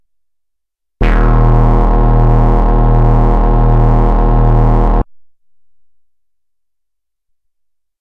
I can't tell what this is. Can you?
SW-PB-bass1-Eb1
This is the first of five multi-sampled Little Phatty's bass sounds.